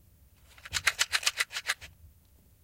20170530 matchbox.shaking
Shaking of a box of (wooden) matches. Sennheiser MKH 60 + MKH 30 into Shure FP24 preamp, Tascam DR-60D MkII recorder. Decoded to mid-side stereo with free Voxengo VST plugin